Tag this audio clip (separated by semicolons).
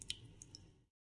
hand-made; drops; water